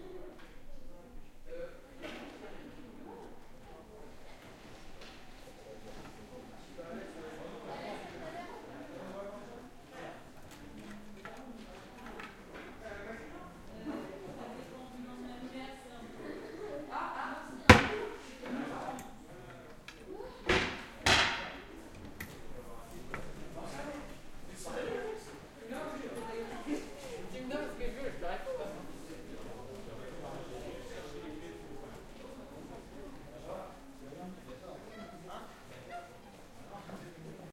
sons de cassier qui s'ouvre et se ferme